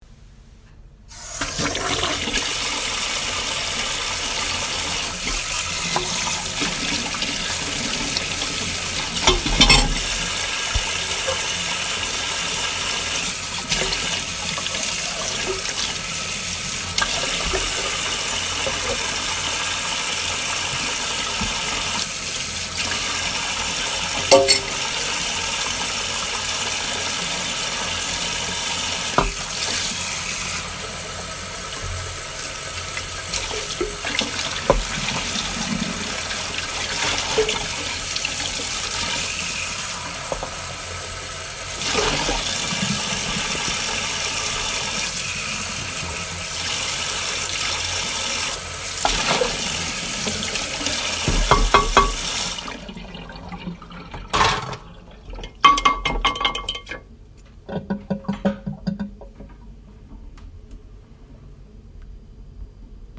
wash the dishes with water
clean, cleaning, dishes, faucet, kitchen, plates, rinse, sink, wash, washing, water